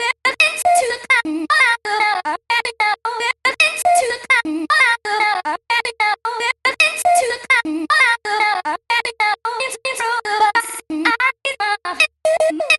Dubstep vocal chops, eight-bar loop at 150 beats per minute.
150-bpm, 8-bars, vocal, vocals, chop, dubstep, singing, slice